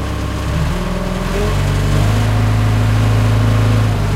JCB Engine Revving Up
machine, Machinery, Factory, medium, low, Mechanical, electric, Rev, high, Industrial, motor, Buzz, engine